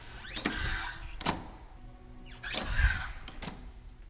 I recorded this sound back in 2002. Hydraulic from a door opening and closing.